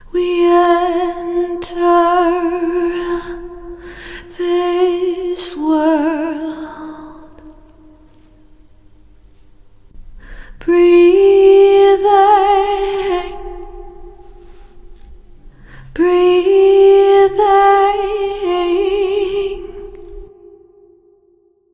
simple female vocal "we enter this world breathing"
Me singing "we enter this world breathing". Compression, eq adjustments and reverb added. 90bpm 4/4 time.
Thank you for remembering to credit to Katarina Rose in your song/project description. Just write "vocal sample by Katarina Rose" in the project description. It's as easy as that!
Recorded in Ardour, using a t.bone sct-2000 tube mic, and edirol ua-4fx recording interface. Added compression, reverb, and eq adjustments. Any squeaking sounds present are only on the streamed version; the downloadable clip is high quality and squeak-free.
calm, female, quiet, singing, vocal